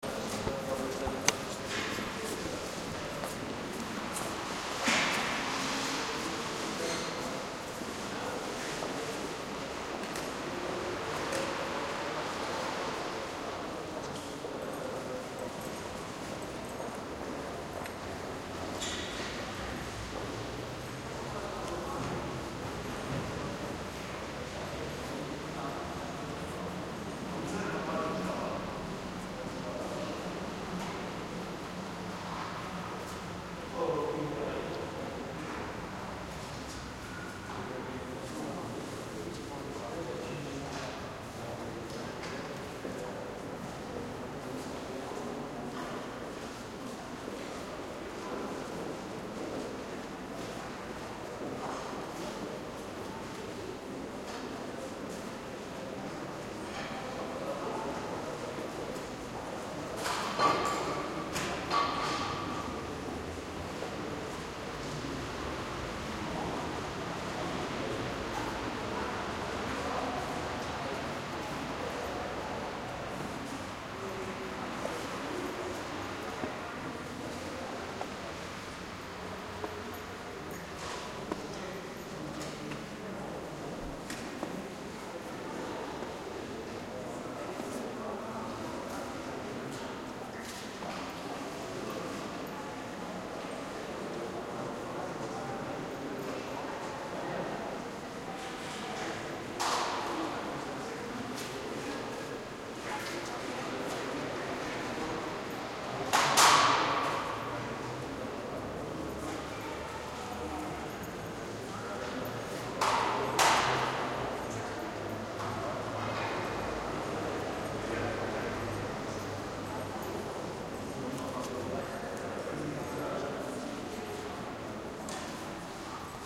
train station hall - Bahnhofshalle
train station hall incoming passengers
bahnhofshalle, hall, train